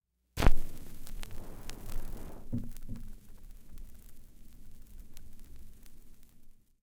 Needle Drop
The sound of lowering the stylus onto a vinyl record.
crackle, LP, pop, record, surface-noise, turntable, vinyl